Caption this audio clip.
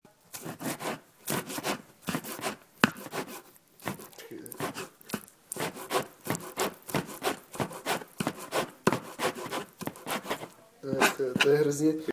cutting / slicing
we cut some fried garlic into spaghetti, yummy!
knife, oil, garlic, slicing, slice, board, onion, flesh, meat, cutting